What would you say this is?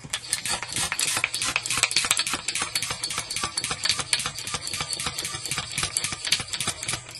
A skinny balloon being pumped up using a small pump (similar to a bicycle pump). The sound is wheezy, squeaky, and there are some mechanical clicking components due to the mechanism of the pump.
Recorder: Olympus digital voice recorder.
Microphone: Sony ECM-MS907.